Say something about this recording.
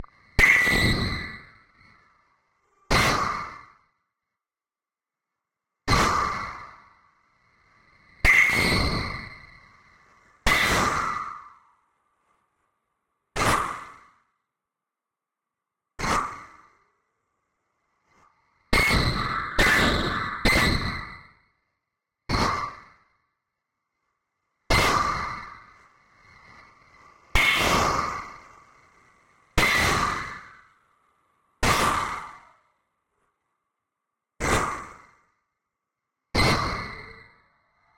Wood Hit 01 Terror Strike
The file name itself is labeled with the preset I used.
Original Clip > Trash 2.